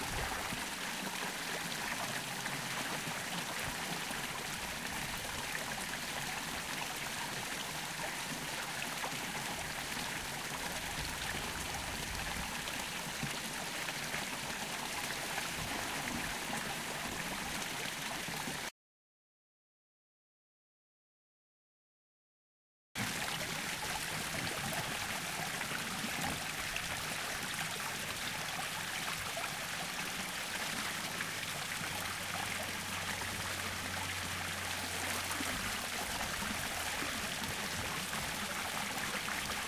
WT - fuente edrada Stereo
stereo recordings of a fountain in edrada, galicia.
galicia, water